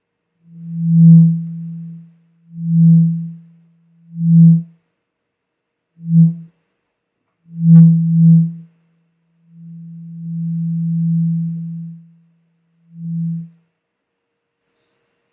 larsen low + hi freq
low and hi frequency larsen effects
recorded with Rode NTG2 connected to Motu Ultralite MK4
recording, microphone, rec, studio, frequency, mic, larsen, audio